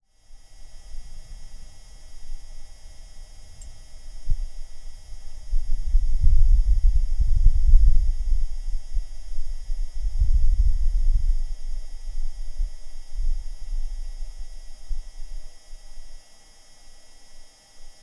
Mars field recording (NASA)
Soundscape recording from the Perseverance rover, Feb. 20, 2021. Jezero Crater, Mars.
ambiant, field-recording, hum, machine, mars, nasa, space, whirring, wind